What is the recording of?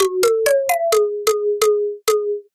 Klasky-Csupoesque Beat (130BPM 11 8) Pattern 009 (without Drums)
Short, single bar loops that use a unique tuning system (that I have described below). The end result of the tuning system, the timbre of the instrument, and the odd time signature (11/8) resulted in a sort of Rugrats-esque vibe. I named the pack based on the creators of Rugrats (Klasky-Csupo). The music has a similar sound, but it's definitely it's own entity.
There are sixteen basic progressions without drums and each particular pattern has subvariants with varying drum patterns.
What was used:
FL Studio 21
VST: Sytrus "Ethnic Hit"
FPC: Jayce Lewis Direct In
Tuning System: Dwarf Scale 11 <3>
Instead, the scale used is actually just-intoned (JI) meaning that simple ratios are used in lieu of using various roots of some interval (in the case of 12 tone temperament, each step is equal to the twelfth root of 2, then you take that number and you multiply that value by the frequency of a given note and it generates the next note above it).
Microtonal, Xenharmonic, Xenharmonic-Beat, Xenharmonic-Loop, Signature, Klasky-Csupo-Like, Warbly, 90s-Retro, Microtonal-Loop, Warble, Odd-Time-Loop, Whimsical, Loop, 130BPM, Odd-Time, Woozy-Sounding, Oddball-Beat, Oddball-Loop, Klasky-Csupo-esque, Odd-Time-Beat, 11-8-Time, Beat, Eleven-Eight-Time, Microtonal-Beat, Woozy, Whimsy